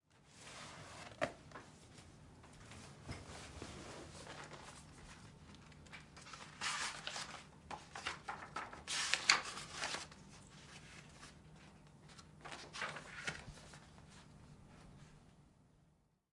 Abriendo Libro y Pasando Paginas
Opening a book and moving pages recorded with Sennheiser MKH 416 and Zoom F8 as recorder.